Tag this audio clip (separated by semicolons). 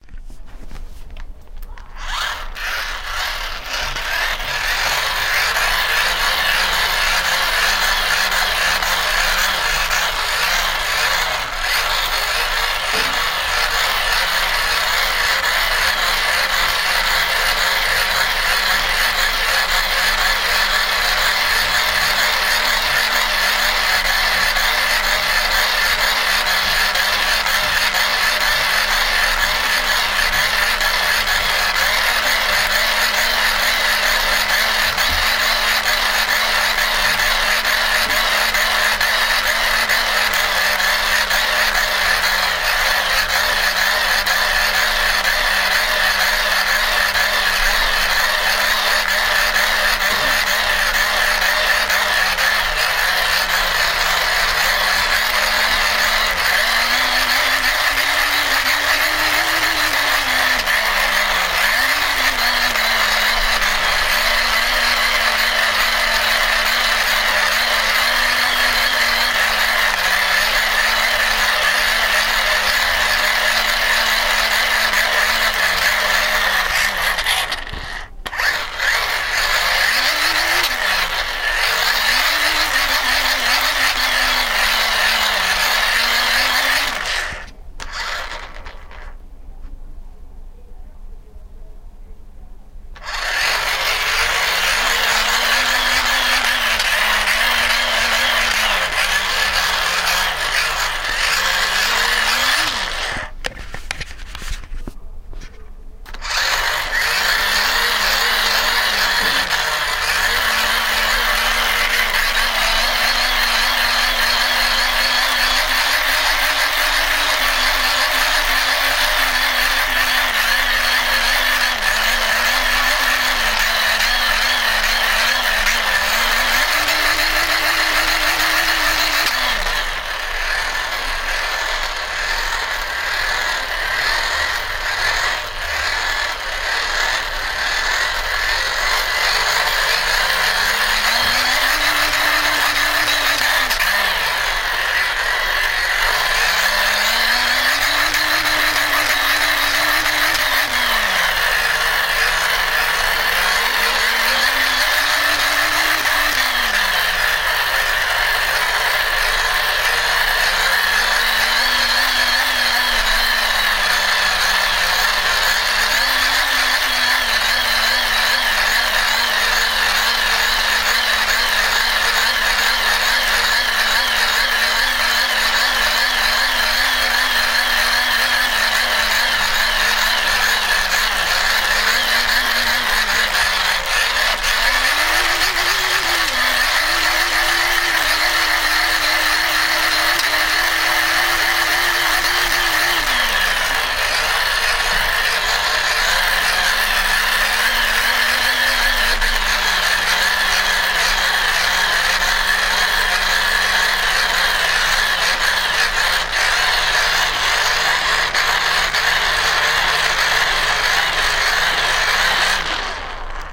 Battery; Dynastar; Jitter; T2-1; T2xorT1